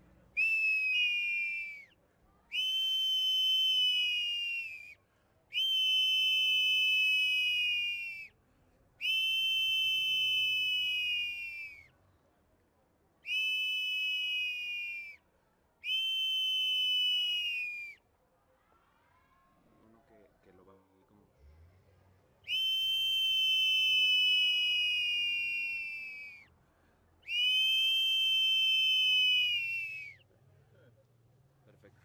Ocarina:prespanico:VirgenDeZapopan
This audio was recorder with a ZOOM F4 + MKH 416, for a mexican documental of the virgin of Zapopan, in GDL,Jalisco.Mex.